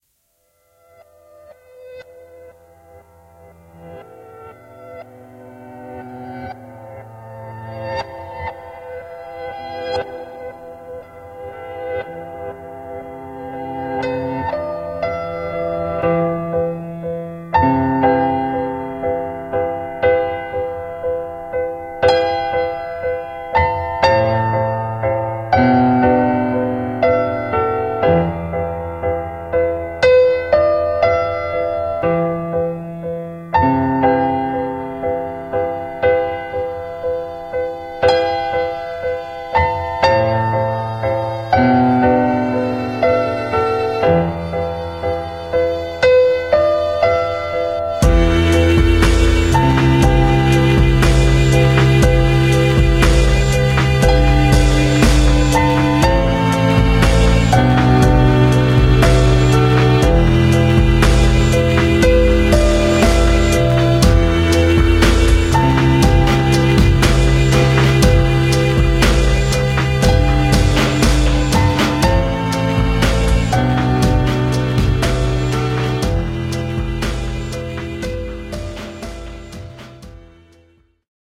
True Identity (1)
Compostion, piano, stereo, tube